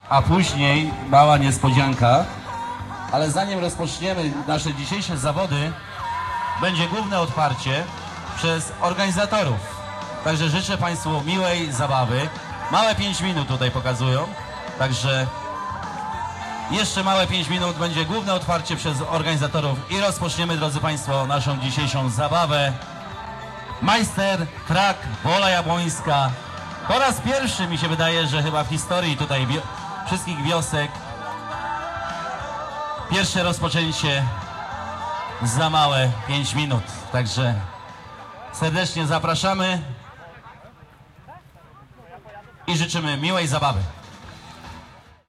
111014 majstertrak before
the First Majster Trak - race of tractors in Wola Jablonska village (Polad). The event was organized by Pokochaj Wieś Association.
Recorder: marantz pmd661 mkii + shure vp88
race, quad, tractor, rural, village, Jab, Wielkopolska, crowd, ska, o, voices, machine, Wola, Poland, people, noise, ethnography, fieldrecording